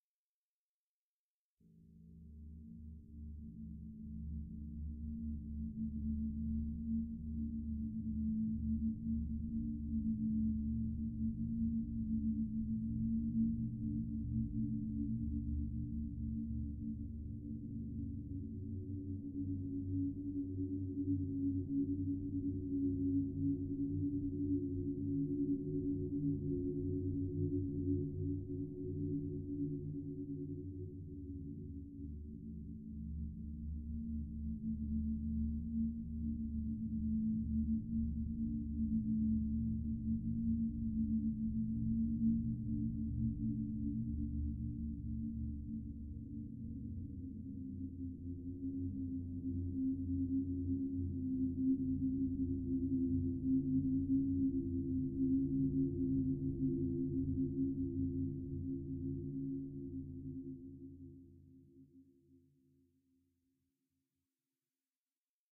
made with vst instruments